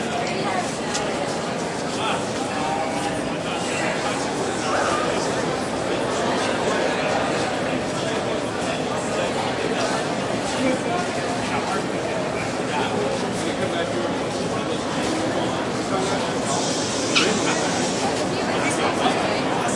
crowd int large metro entrance after concert R
after, concert, crowd, entrance, int, large, metro